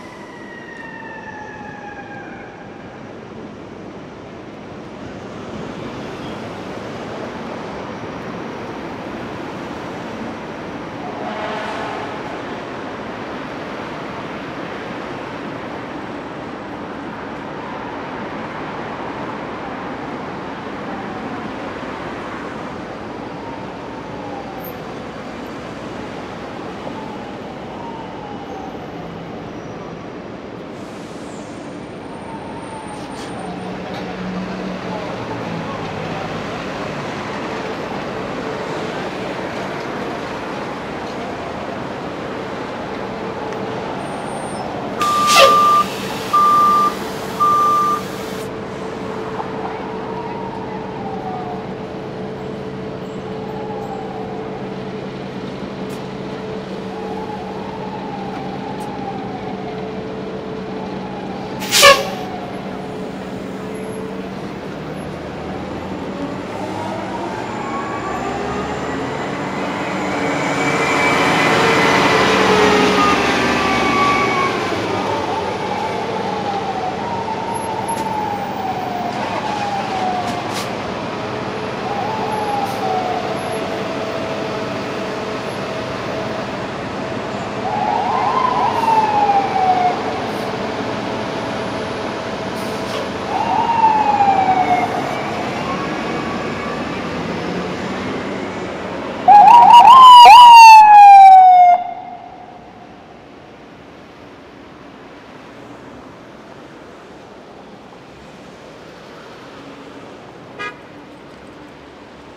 trucks sirens
some distant police sirens in nyc
ambient, city, nyc, police, sirens, truck